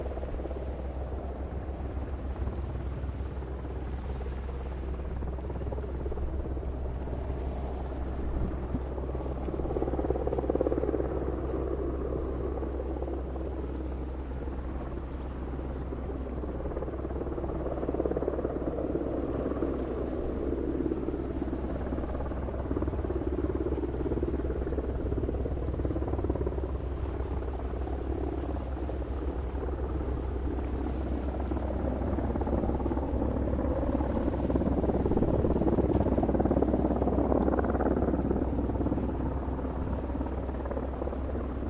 Navy helicopter flying over Plymouth Sound, Devon, UK. This is one of two, I have added some compression to this one. Sounds of waves and birds in the background
engine, field-recording, helicopter